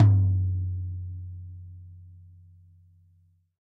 Tom2-Soft3
These are samples I have recorded in my rehearsal room/studio. It's not a fancy studio, but it's something. Each drum is recorded with an SM57 on the top head and an SM58 on the resonant head, which have been mixed together with no phase issues. These samples are unprocessed, except for the kick drum which has had a slight boost in the 80hz region for about +3db to bring out that "in your chest" bass. The samples are originally intended to be used for blending in on recorded drums, hence why there aren't so many variations of the strokes, but I guess you could also use it for pure drum programming if you settle for a not so extremely dynamic and varied drum play/feel. Enjoy these samples, and keep up the good work everyone!
drum, snare, studio, unprocessed, 24, hard, bit, kick, tom, medium, recorded, sample, soft, erkan, instrument, floor, bass, dogantimur